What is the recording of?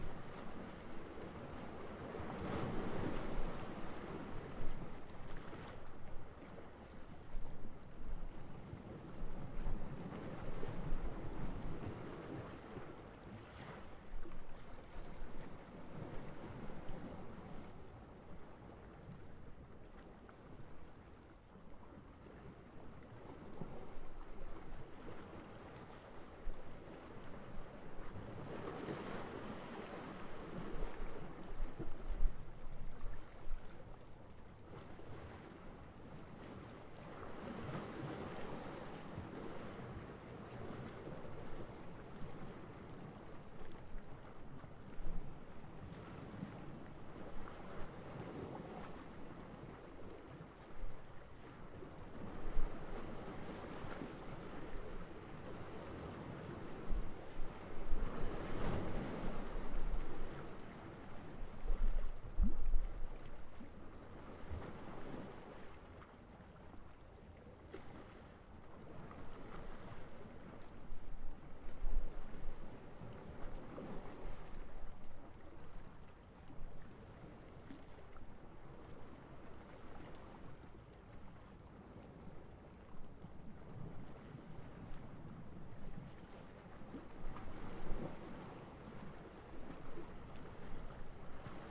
Recorded at Baia del Rogiolo, Livorno, Italy
ambience, ambient, bay, beach, mediterranean, nature, ocean, rocks, sea, shoreline, soundscape, stereo, water, waves